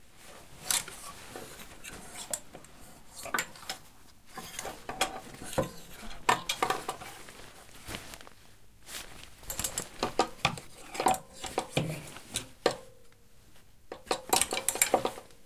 Joxa med galgar
The sound of me pulling on some coathangers.